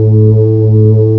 a soft synth tone loop of a moog rogue, 2 oscillators beating
analog, moog, pad, soft, synth, texture, tone, warm, wave